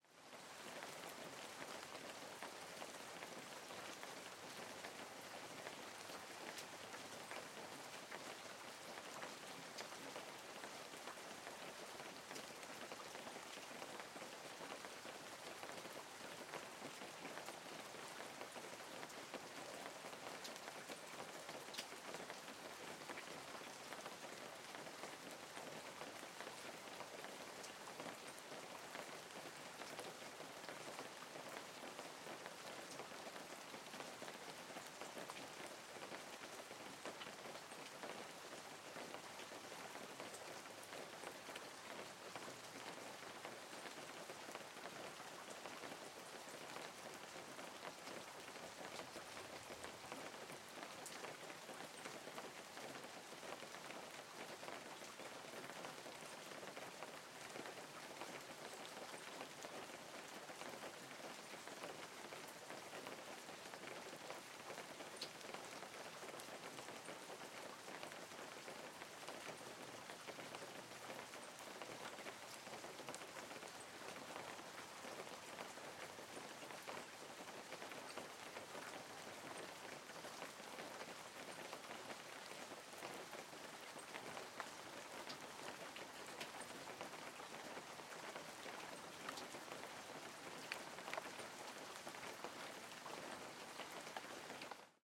Rain dropping light. Rural land, without any surrounding sounds. Useful like background. Mono sound, registered with microphone Sennheiser ME66 on boompole and recorder Tascam HD-P2. Brazil, september, 2013.